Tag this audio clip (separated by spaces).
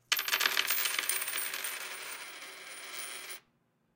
change clink coin coins drop dropping fall money plunk